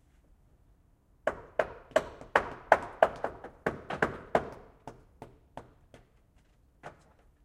The house opposite of mine gets a new roof and I have an extra alarm clock. The recorded sound is that of the craftsmen building the wooden construction. Marantz PMD670 with AT826, recorded from some 10 metres away. Unprocessed.
hammering, hammer, environmental-sounds-research, building, roof, construction, wood, build, field-recording